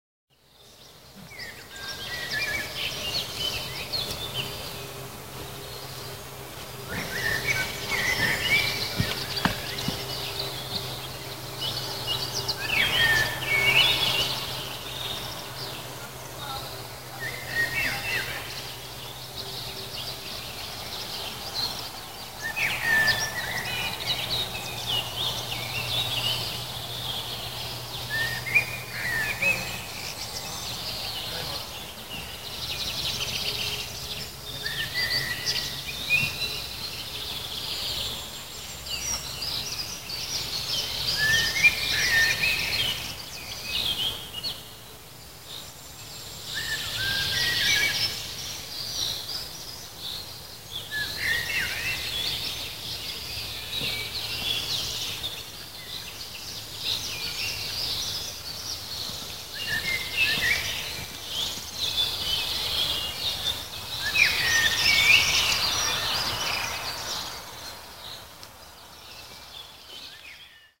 Early summer, czech wood outside the camp, early morning ambiance
Early summer, czech wood outside the camp, early evening ambiance
ambiance, birds, czech, early, forest, nature, summer, wood